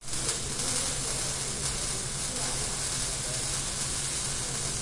Welding at the Box Shop in San Francisco.